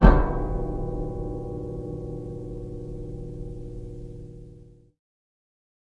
cello bell 1
Violoncello SFX Recorded
Cello, Violoncello, Hit, Cluster, Bell